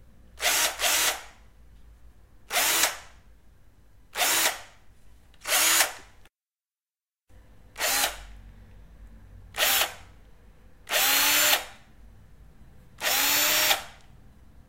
Hitachi DS12DVF3 power screwdriver. Driving fordward and backwards. Shoert drives. Recorded with Zoom H4 handy recorder.
electric, power-tool, skrewdriver